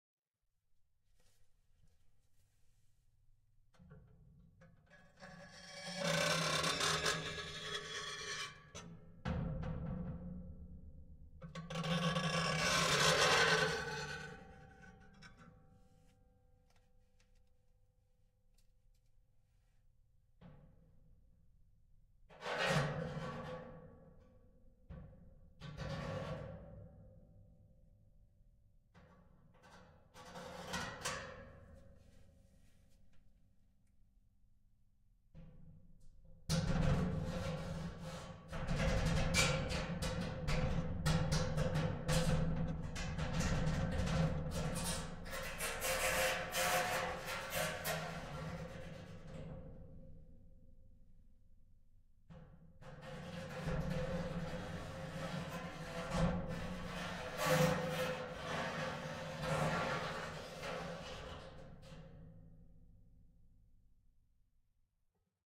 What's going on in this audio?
scratching metal 01
An empty, resonant metal box, treated by various objects.
dungeon, horror-fx